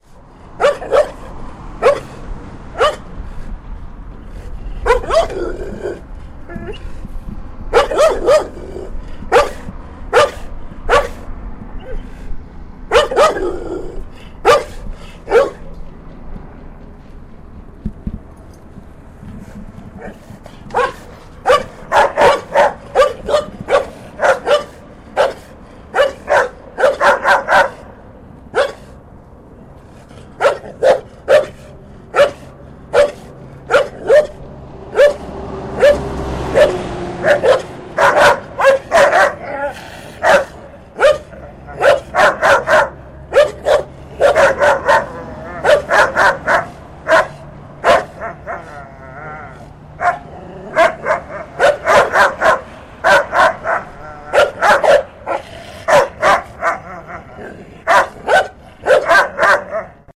scarier Denver dogs
I found another pair of dogs around the corner from where I found the first two and they were even growlier and scarier. This neighborhood was junkyard dog central! Some great slobbery barks.
AudioTechnica AT22 > Marantz PMD660 > edited in Wavelab